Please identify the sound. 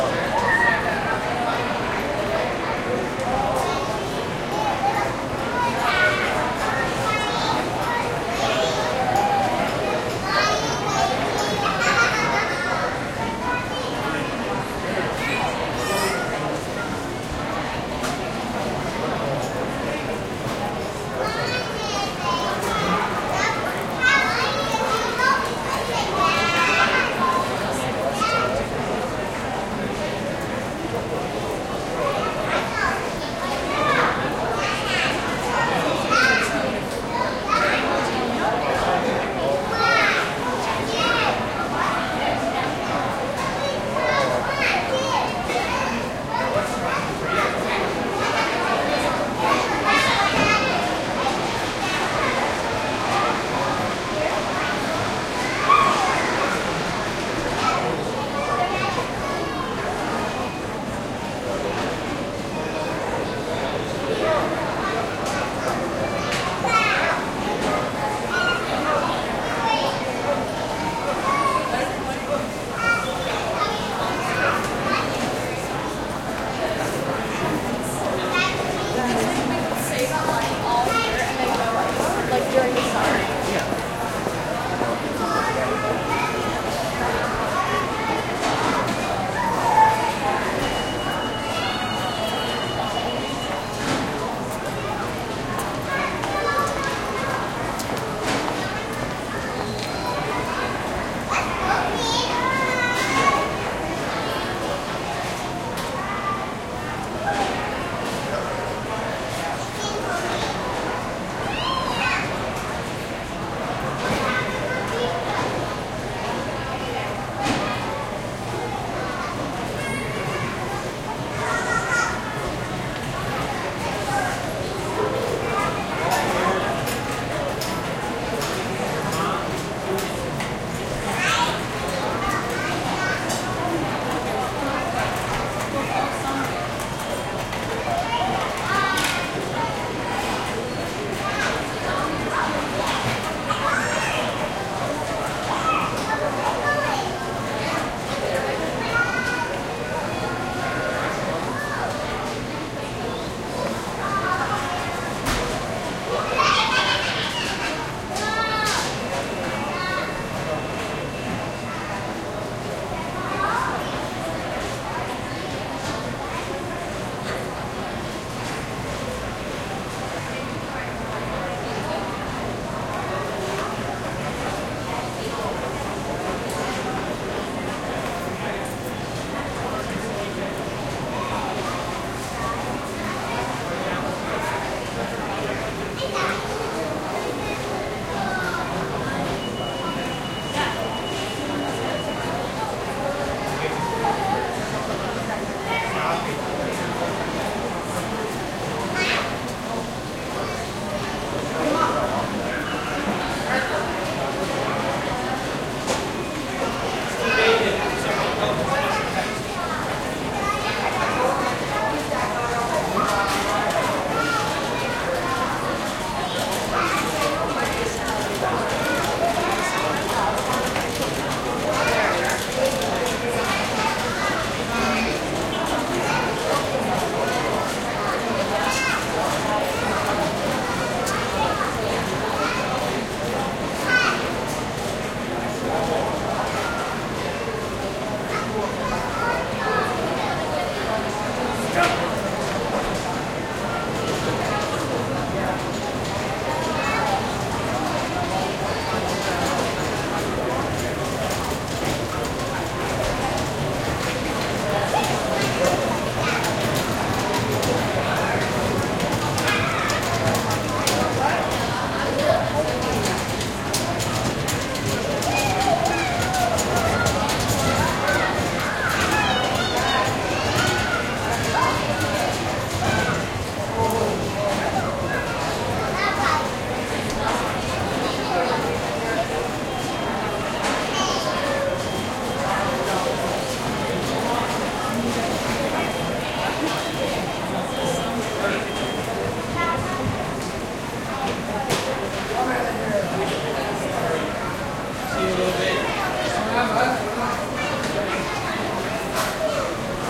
Mall Ambience
Recording made April 22, 2017 at the Mayflower Mall in Sydney, Nova Scotia, Canada in the food court while I waited for my partner to get a haircut. :) No specific special sounds to call out here; this is just good general walla for a mall scene.
Recorded on iPhone 6 Plus 128 GB
general-noise, mall, soundscape, ambiance, atmosphere, background, ambience, field-recording, background-sound, walla, ambient